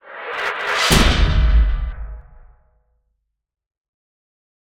Skewer Slam is a combination of my knife sharpening sounds and metallic impacts/wobblers (some not yet uploaded here!) to create a sesnsation of something big, intense and lethal coming towards whoever it either find offensive or is ambulatory.
As a personal note; the distortion you hear is not because of clipping in the initial recording but because of the higher acoutistic resonance and limiters applied which will not sound like this when downloaded.